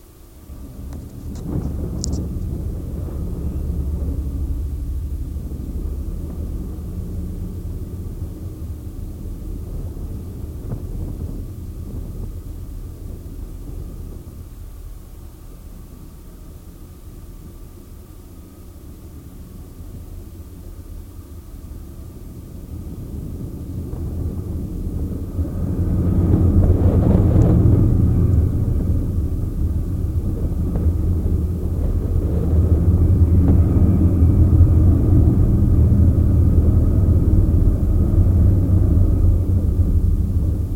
Denver Sculpture Columbus
Contact mic recording of sculpture “In Honor of Cristoforo Colombo” by William F Joseph, 1970. This stands near the west end of the central green in Denver’s Civic Center Park. Recorded February 20, 2011 using a Sony PCM-D50 recorder with Schertler DYN-E-SET wired mic.